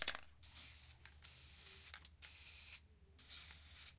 3 tinte de color
aerosol, can, paint, spray, spraycan